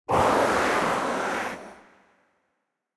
cell scream low ms 1 edit2
monster, scream